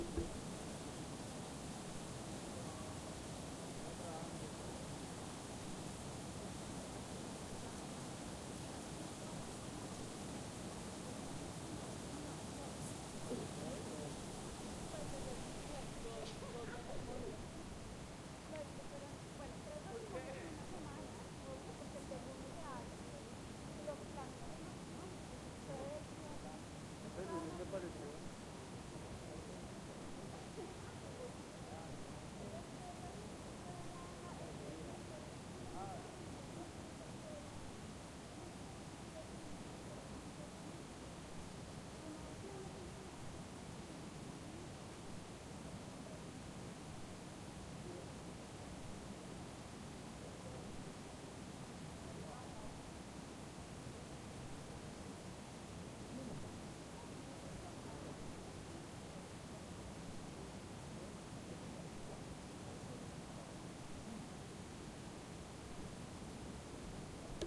Quebrada La Vieja - Cuerpo de agua con voces
Grabación en la Quebrada La Vieja cerca a bodega del Acueducto de Bogotá -Colombia
Sonidos acuáticos y voces de peatones a las 07:59 a.m.
Field recording from river La Vieja near the Acueducto's warehouse Bogotá - Colombia
Aquatic sounds and pedestrians voices at 07:59 a.m
agua, aquatic, bogota, colombia, field-recording, footprints, paisaje-sonoro, pasos, peatones, pedestians, people, quebrada, river, voces, voice